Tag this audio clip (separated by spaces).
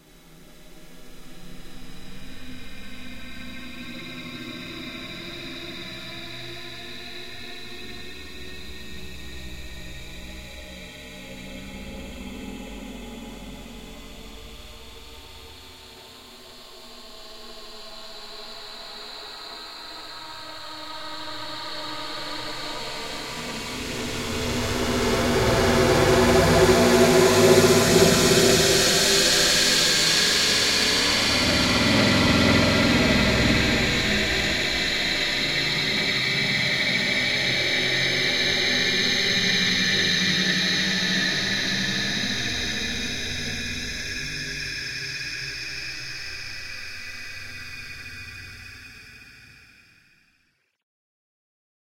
male human slow cold sneeze slowmotion processed